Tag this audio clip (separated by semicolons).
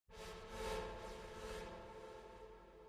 background-sound; bogey; creepy; drama; dramatic; Gothic; haunted; horror; nightmare; phantom; scary; sinister; spooky; suspense; terrifying; terror; thrill